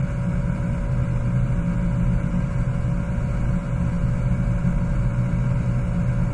another extractor vent recording loop